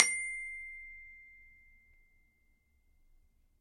Samples of the small Glockenspiel I started out on as a child.
Have fun!
Recorded with a Zoom H5 and a Rode NT2000.
Edited in Audacity and ocenaudio.
It's always nice to hear what projects you use these sounds for.
campanelli, Glockenspiel, metal, metallophone, multi-sample, multisample, note, one-shot, percussion, recording, sample, sample-pack, single-note